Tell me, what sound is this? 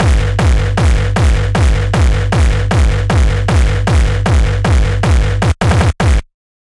xKicks - Whispers of Bass
There are plenty of new xKicks still sitting on my computer here… and i mean tens of thousands of now-HQ distorted kicks just waiting to be released for free.
bass, bass-drum, bassdrum, beat, distorted, distortion, drum, gabber, hard, hardcore, hardstyle, kick, kickdrum, techno